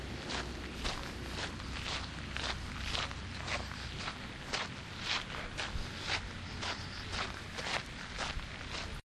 washington walkingthemall snip2
Walking through the middle of the National Mall between the Art Gallery and the Air and Space Museum recorded with DS-40 and edited in Wavosaur.
field-recording, road-trip, summer, travel, vacation, washington-dc